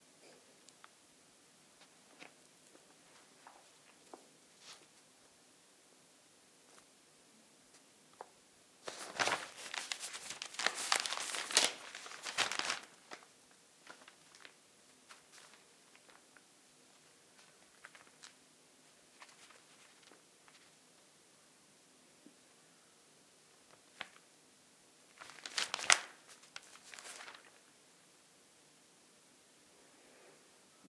Newspaper Reading Foley
The sound of someone reading a newspaper and flipping through pages. Originally recorded as foley for a film project.
crinkle, flip, fold, folding, foley, handling, magazine, newspaper, page, pages, paper, reading, turn